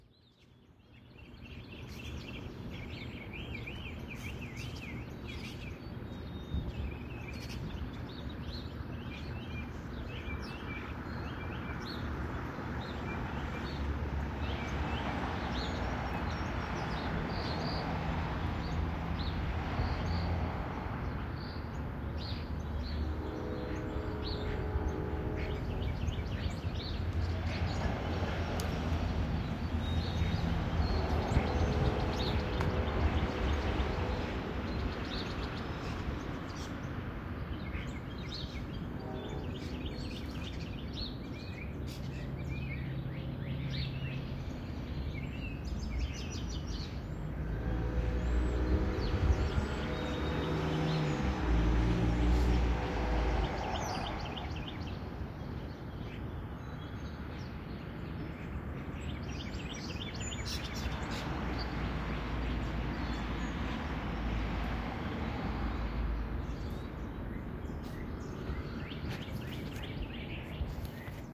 bird sounds
birds, field-recording, virginia